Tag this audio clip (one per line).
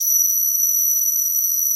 Multisample
Synth
Combfilter
Strings